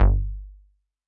octave short Bsss Werkstatt-01 - Marker #5
Classic Moog Bass. Middle C octave, each note recorded separately.
Analog-Bass, Bass-Samples, Classic-Moog, Moog-Bass, Moog-Werkstatt, Multisamples